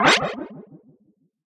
UI Synth 05
An synthesized user interface sound effect to be used in sci-fi games, or similar futuristic sounding games. Useful for all kind of menus when having the cursor moving though, or clicking on, the different options.
ui, select, menu, videogames, electronic, game, gamedev, electric, click, indiedev, gamedeveloping, futuristic, synth, navigate, sci-fi, gaming, sfx, games, indiegamedev, video-game